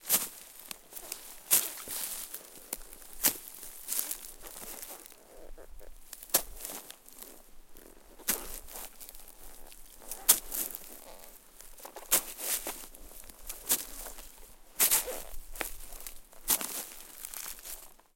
pine-forest--ww2-soldier--step-dry-leaves
Soldier in World War II gear moving in a Finnish pine forest. Summer.
foley, forest, rustle